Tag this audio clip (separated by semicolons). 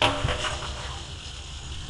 drone; effects; field-recording; pipe; stone; water